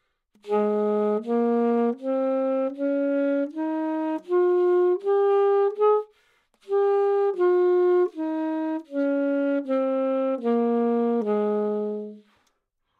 Sax Alto - G# Major
Part of the Good-sounds dataset of monophonic instrumental sounds.
instrument::sax_alto
note::G#
good-sounds-id::6813
mode::major
alto, good-sounds, GsharpMajor, neumann-U87, sax, scale